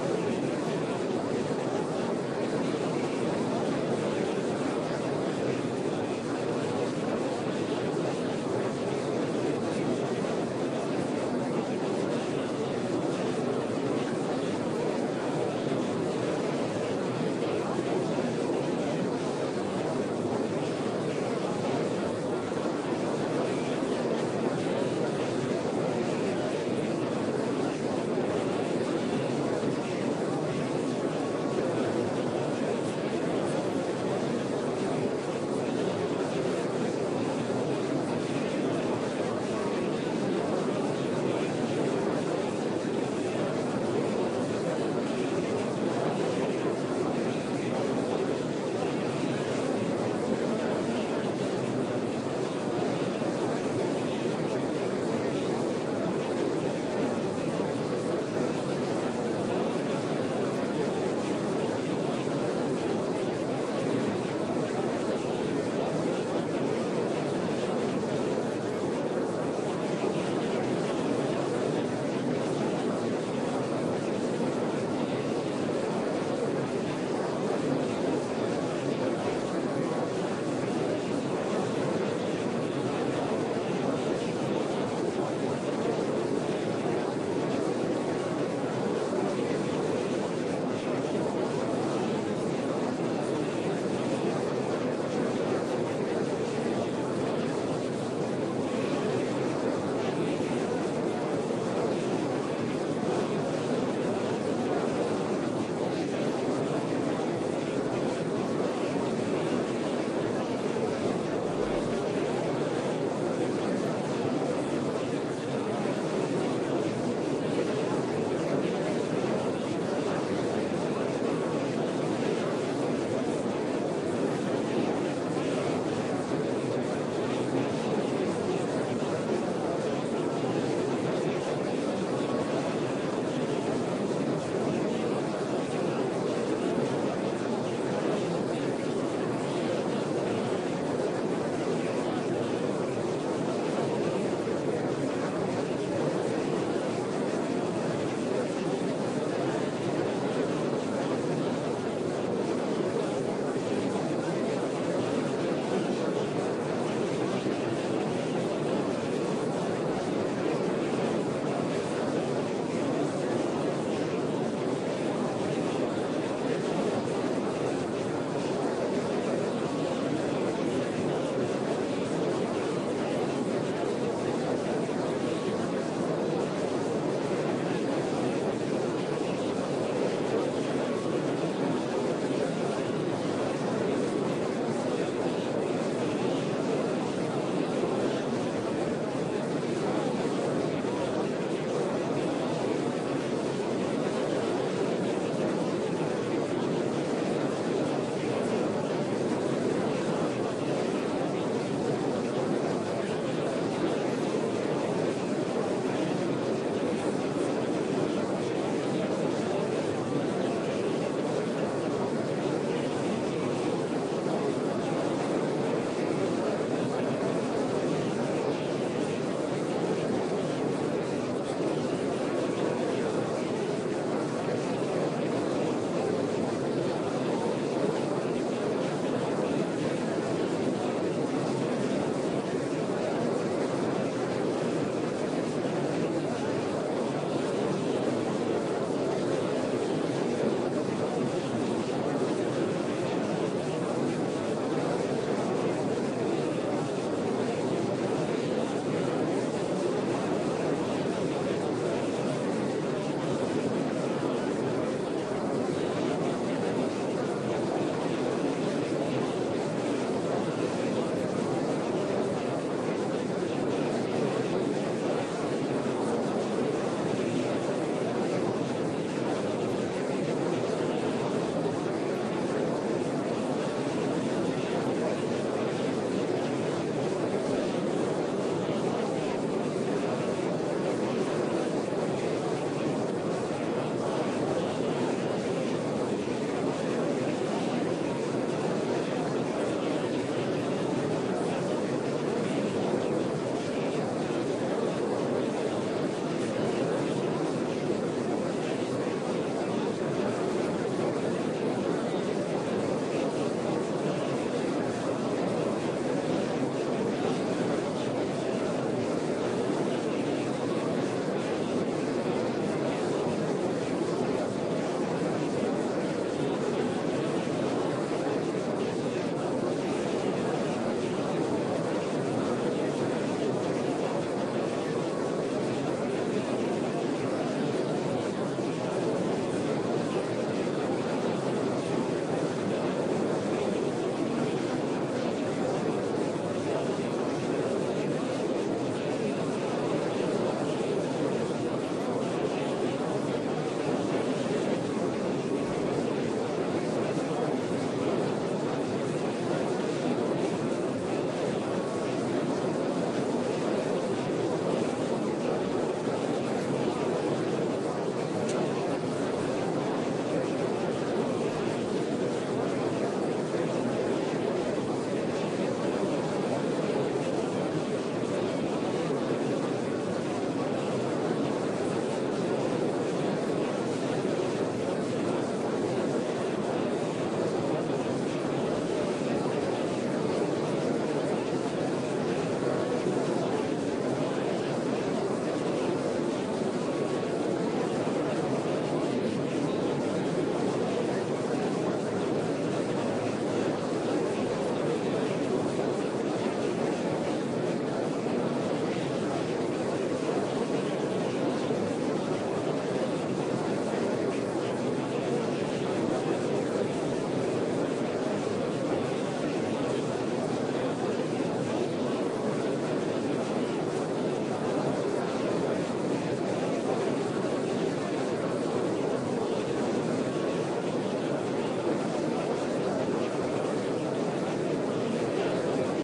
A large crowd of people talking
69 lectures, combined with each other. The result is a steady hum without any post-signal processing.
chatter, conversation, intermission, theater, talking, people, voices, crowd